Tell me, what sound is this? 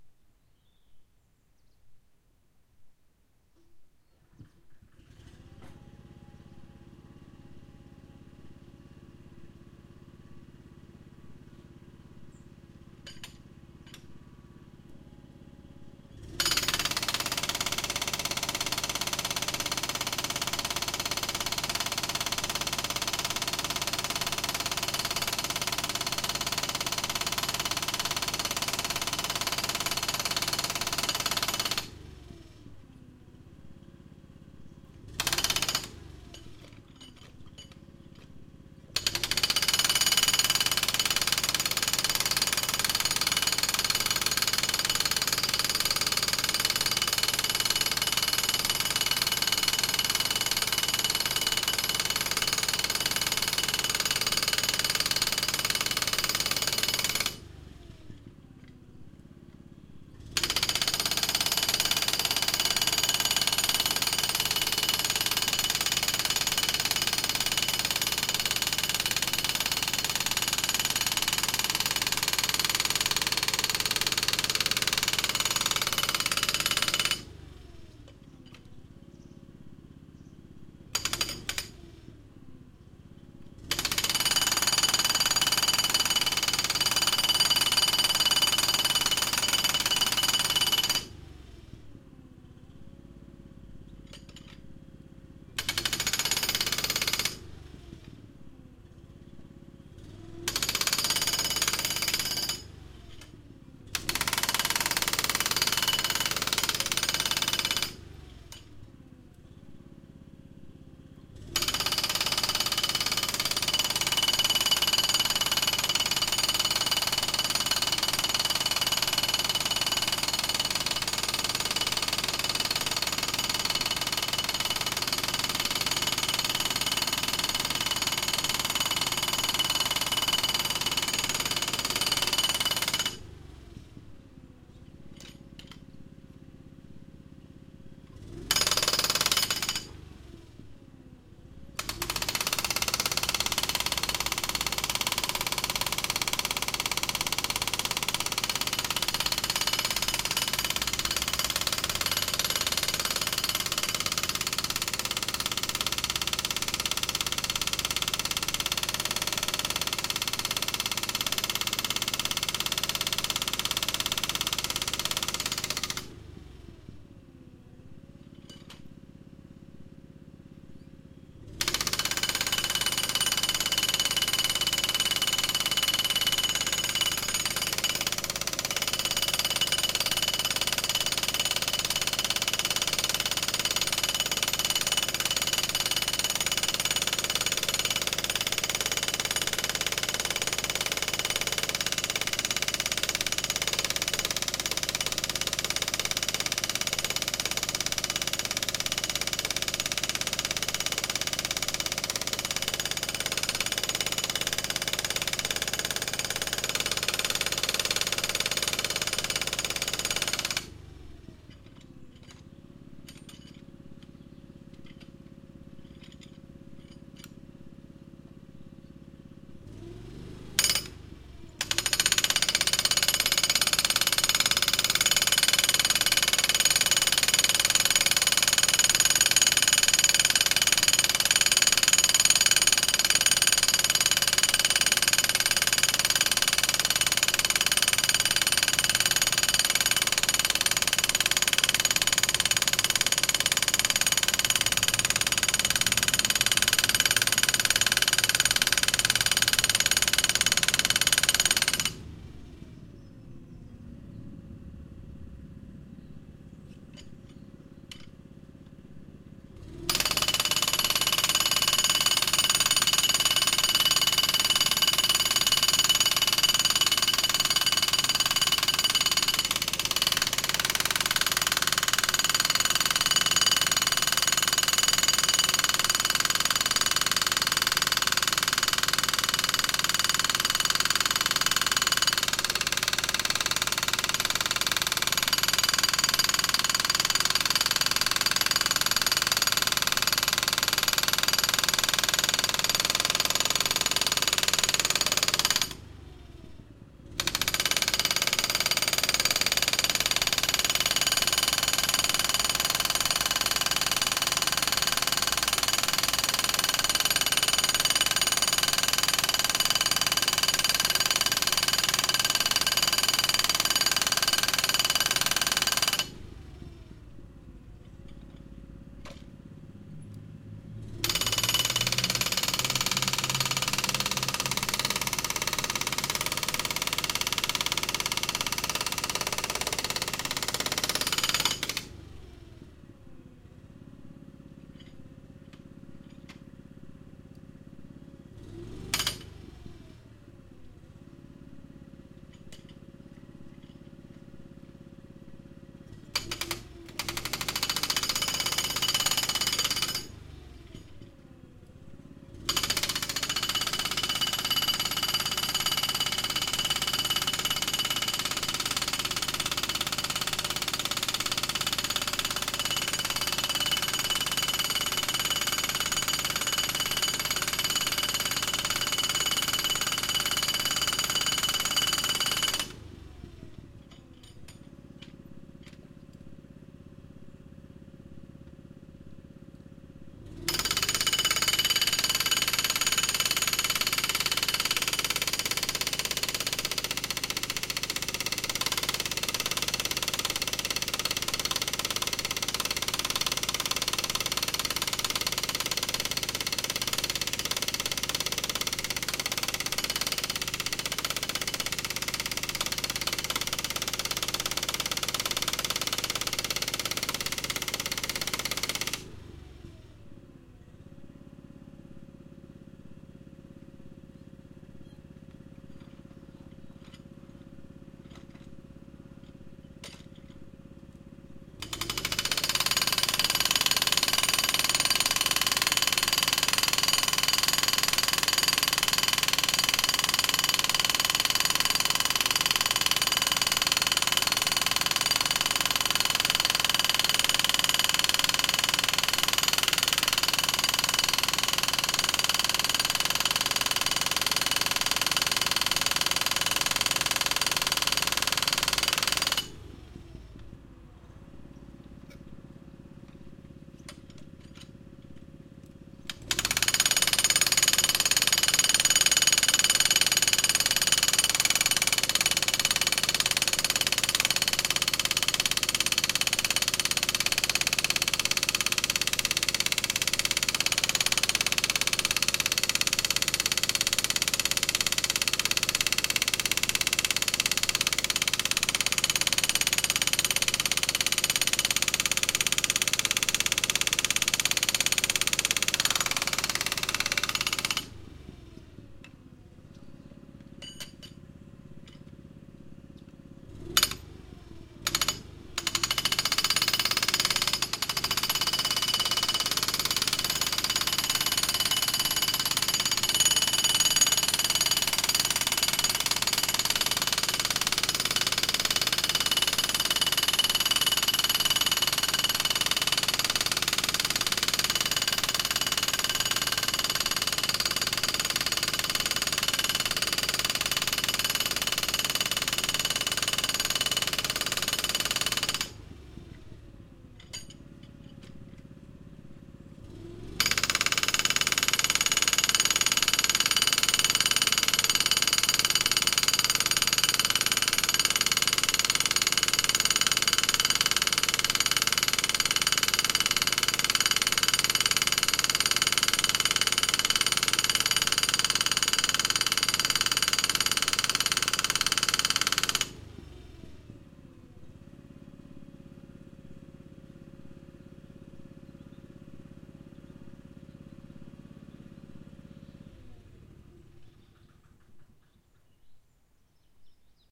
A stereo field-recording of a hydraulic jackhammer powered by a four stroke petrol (gasoline) engine, breaking granite bedrock. Zoom H2 front on-board mics.